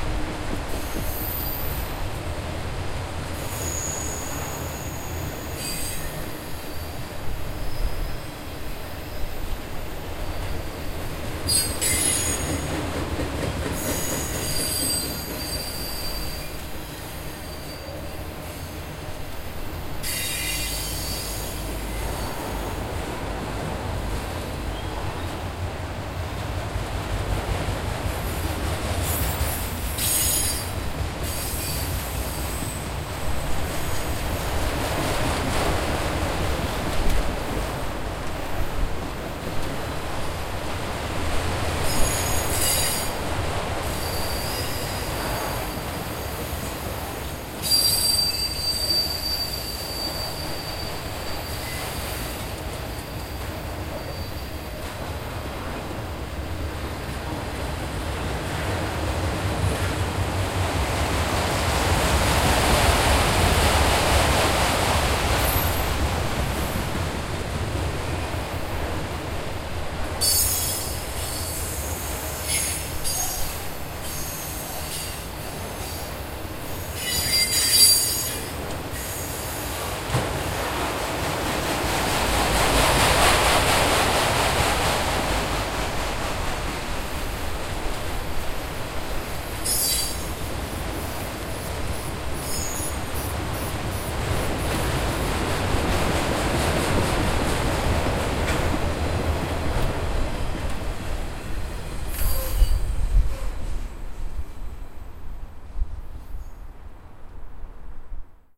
Pittsburgh Train pass close perspective
Freight train passing right to left; Close Perspective; Pittsburgh, January 2015. Wheel squeals, light traffic in background. Wind noise towards the end.
Recorded with Tascam DR-40, stereo.
Passing, Recording, Trains, Freight, Field, Pass, Transport, Train